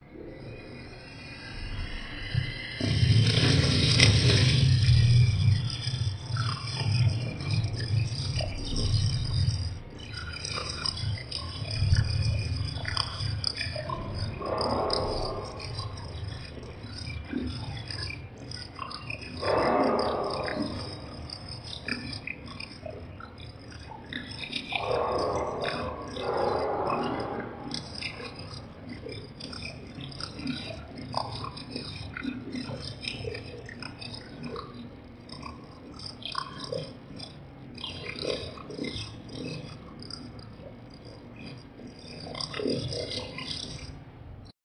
Very distorted and digital sounds resembling dripping water in a cave with echoes and an animal growling. Recorded on mac Apple built in computer microphone. Sound was further manipulated in Reaper sound editor.
ambiance, dark, destruct, distorted, experimental-audio, f13, fnd112